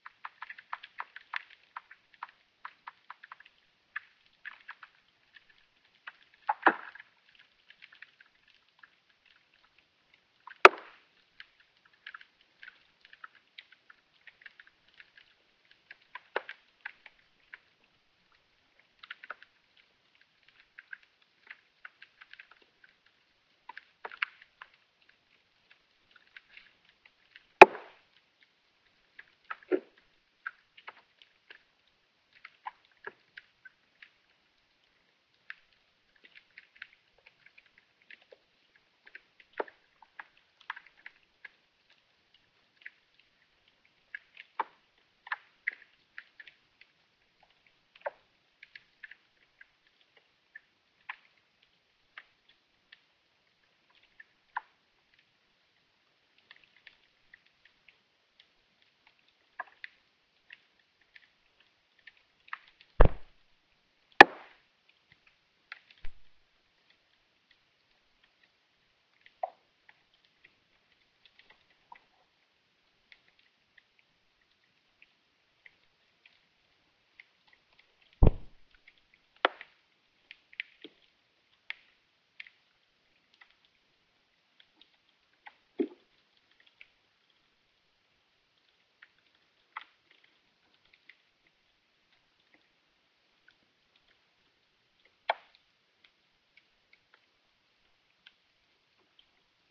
Field recording from an island just outside Helsinki, Finland. Ice is almost melted, just thin layers left.. It was a sunny day so ice kept craking, some light waves. Almost no wind.
Hydrophone -> Tascam HD-P2, light denoising with Izotope RX7
ice, field-recording, craking